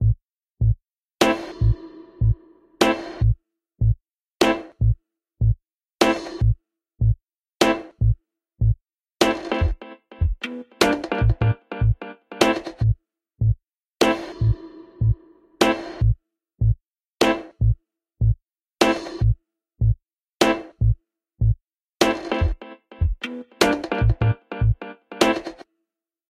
This loop was created with propellerhead reason.
Delay, Drums, Dub, Organ, Loop, Bass, Skank